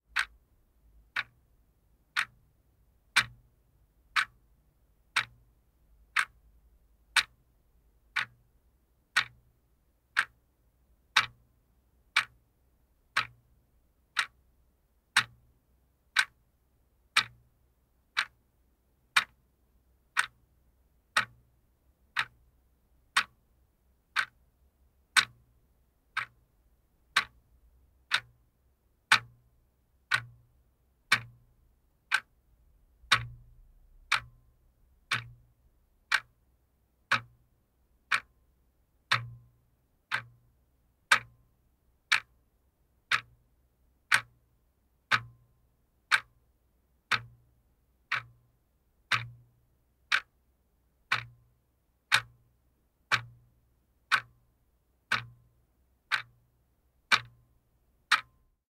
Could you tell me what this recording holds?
Wall Clock hands sound
Sangtai 6168 clock motor/hands from a wall clock
Cleaned with Rx7 + WNS plugin
clock, hands, manecillas, mecanismo, mechanism, reloj, reloj-de-pared, tick-tock, tictac, wall-clock